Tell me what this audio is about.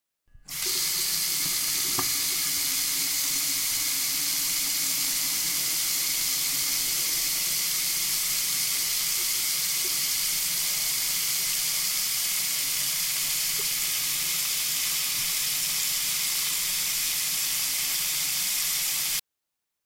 turn on sink
sink, turn